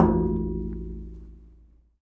Metal container 3 big loud
Hit on a big metal container